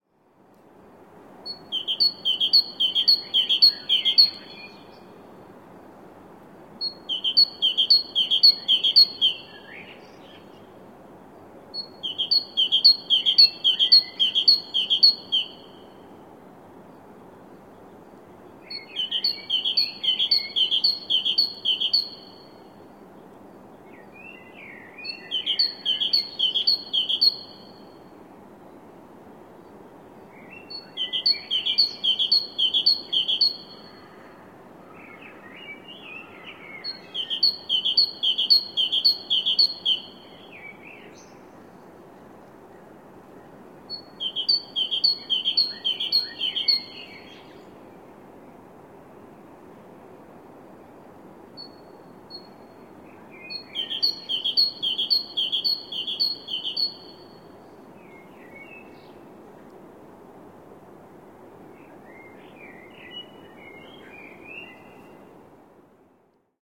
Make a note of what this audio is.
bird in the Hague at dawn 6
Bird singing in a city park of the Hague at dawn. Recorded with a zoom H4n using a Sony ECM-678/9X Shotgun Microphone.
Dawn 09-03-2015
birds, city, dawn, field-recording, hague, netherlands